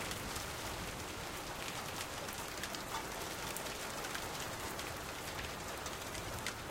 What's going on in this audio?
Recorded the rain from my room with a Blue Yeti, the track is loopable.
field-recording, weather, thunderstorm, rainstorm, horror, rain, inside, scary, thunder, storm, lightning, loopable, loop, nature